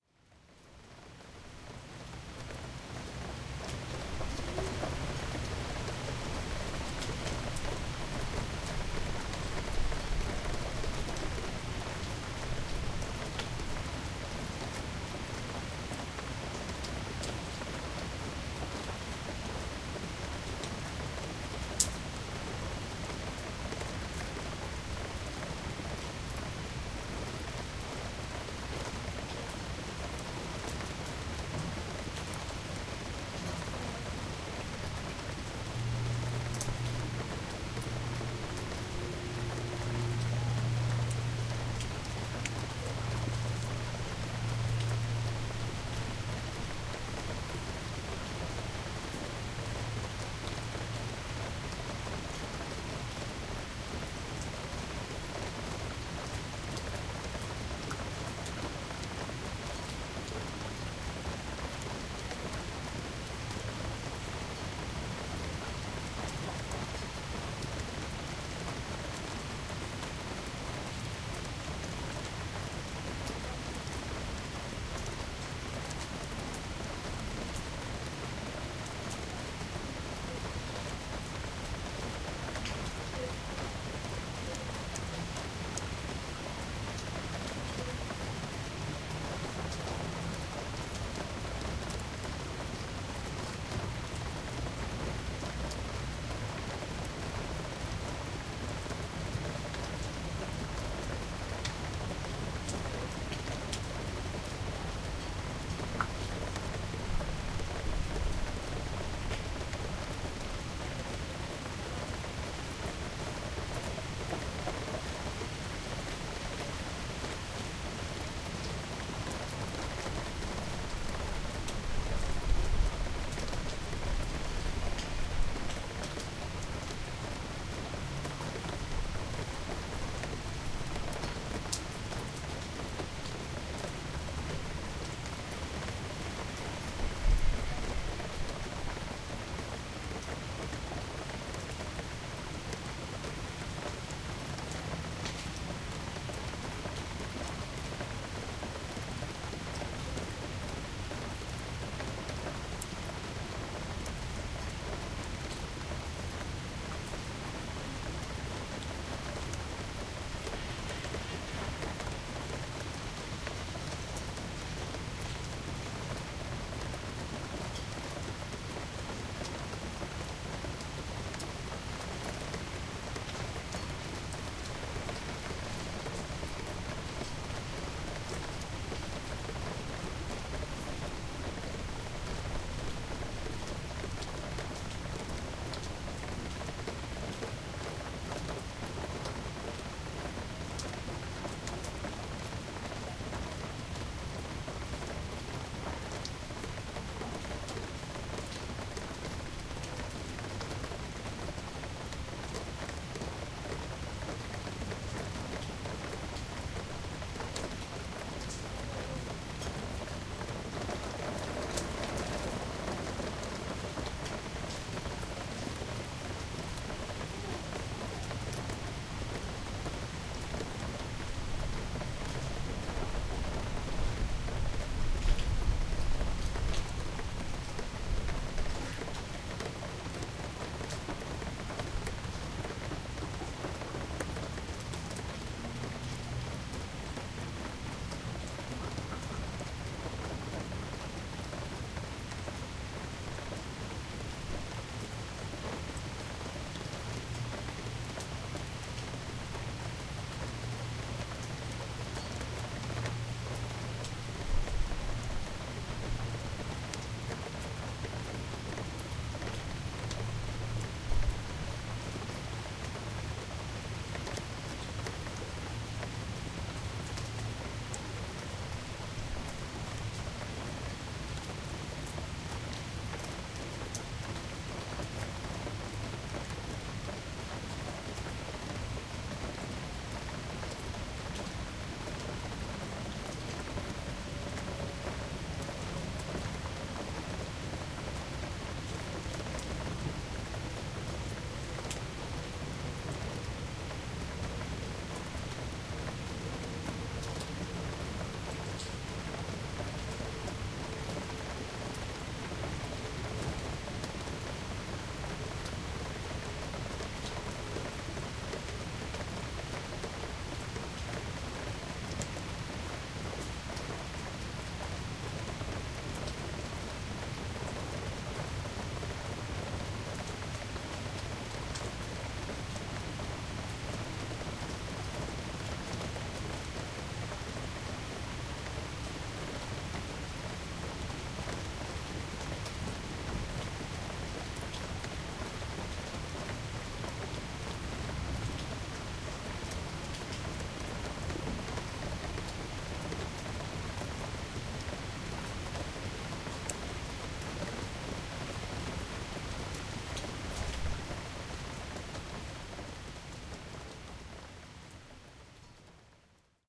Rain Shower
Recorded on a Zoom H4n, internal mics, propped up facing out onto a windowsill